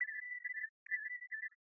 alarm-CopterPanic-01
It's a little sound effect like you can hear in some video games or movies, when an helicopter is going to crash.. Or when a millitary vehicule is damaged...
To use in a loop for better effect.
This sound made with LMMS is good for short movies.
I hope you to enjoy this, if you need some variant I can make it for you, just ask me.
---------- TECHNICAL ----------
Common:
- Duration: 1 sec 718 ms
- MIME type: audio/vorbis
- Endianness: Little endian
Audio:
- Channel: stereo
war, millitary, vehicule, warning, siren, attack, danger